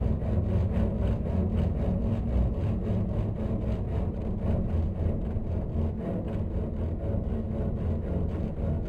Sound of a cello processed. Rhythmical. Looping
cello, looping, Processed